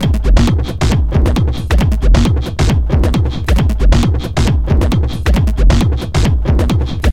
hard swinging techno loop
techno, hard